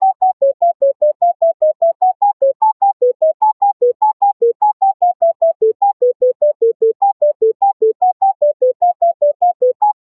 jsyd sampleandhold
Analog style synthesizer patch. Sound produced using my own JSyd software.
jsyd, synthesizer, synth, syd